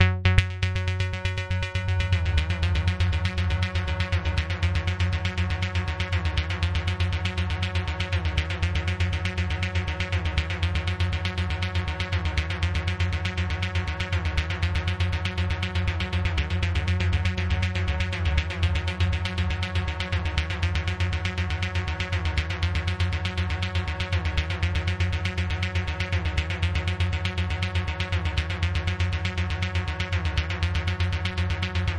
bassline c fsharp c 120bpm
120bpm, ambient, bass, bounce, club, dance, dub-step, electro, glitch-hop, loop, minimal, synth, techno, trance